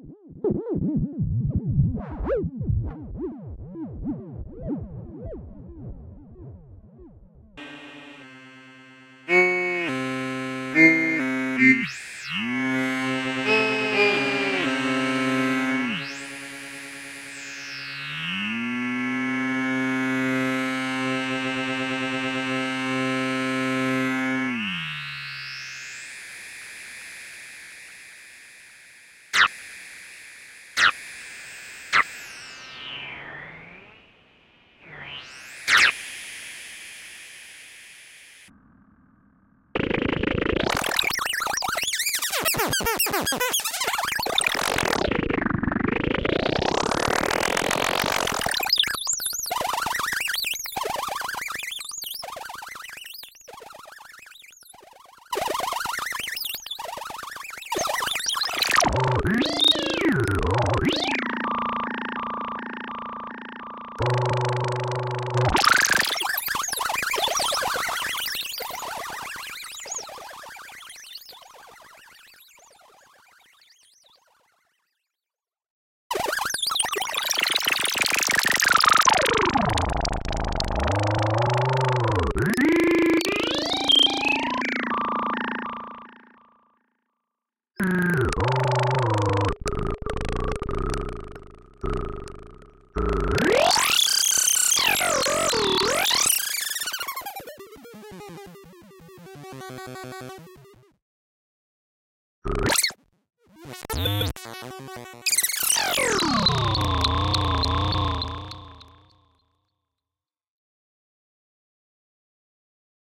Synplant fx 3
This sounds was made simply messing around with the Synplant plugin.
fx, plugin, synth, vst